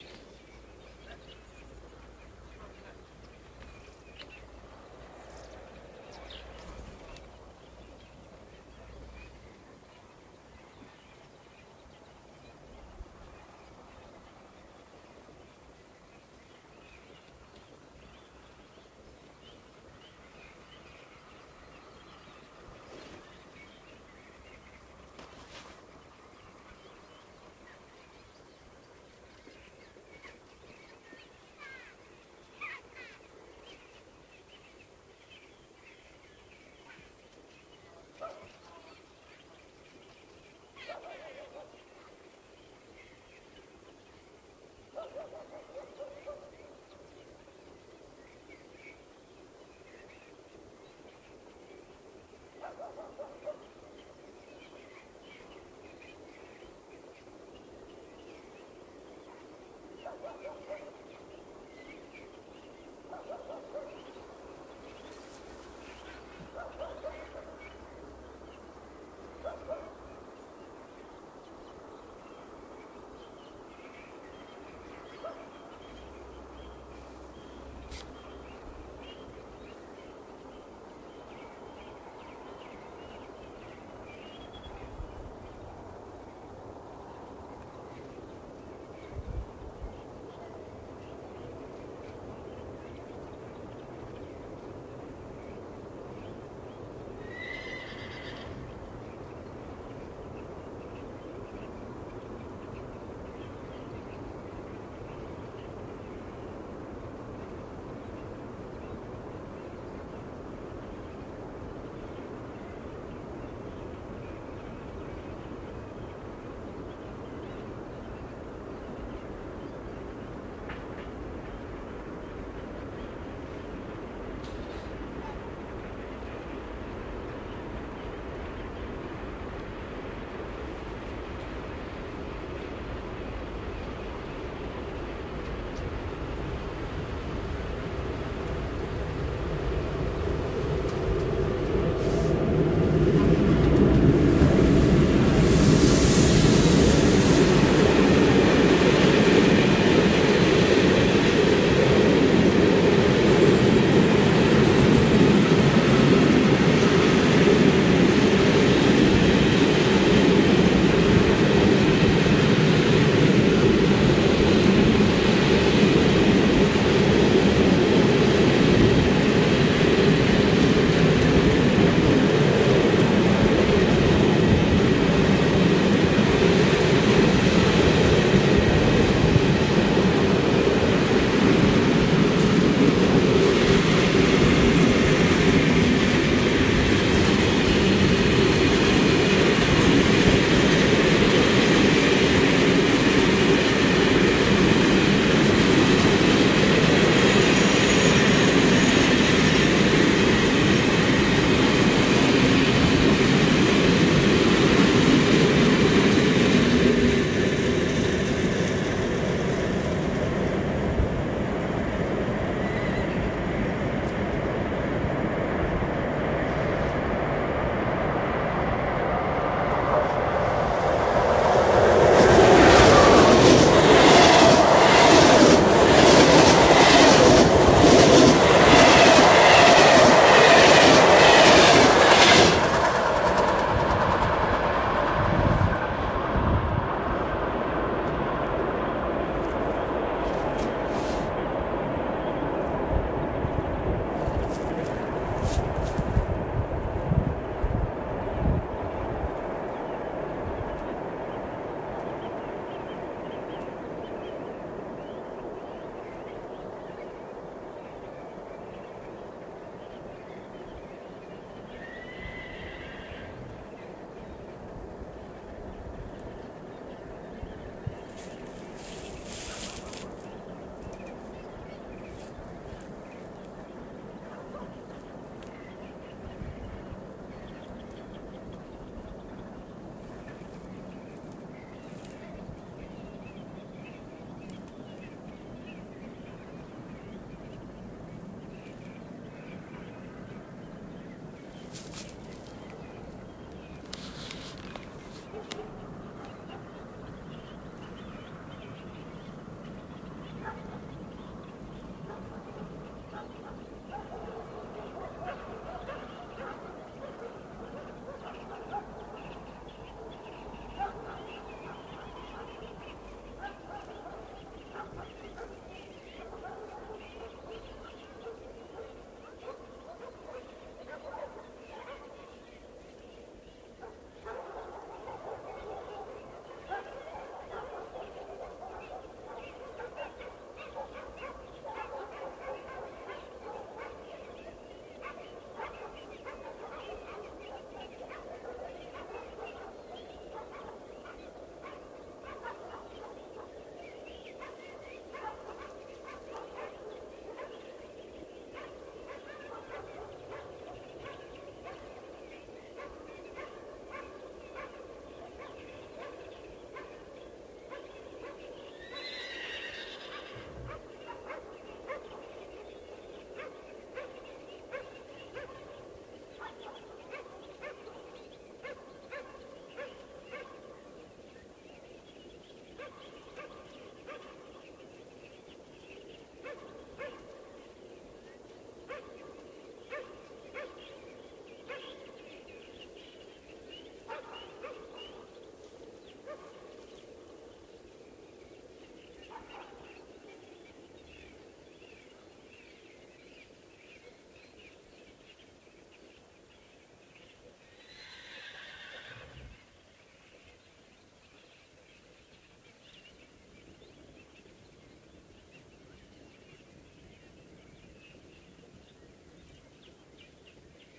water stream + train cross bridge in countryside
train cross once time
countryside : water stream, birds,
urban : far soft cars sound
water, stream, train